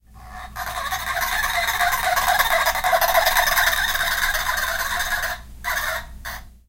fpphone st rollpast 3
Toy phone makes squawking sound as it rolls along, recorded rolling past stationary mics, from left to right. Varying rate of speed.
stereo, toy